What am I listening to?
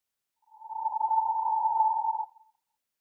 Created with coagula from original and manipulated bmp files.